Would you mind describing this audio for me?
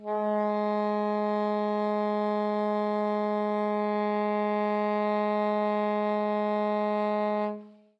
A long concert A flat on the alto sax.
a, flat, howie, long, sax, smith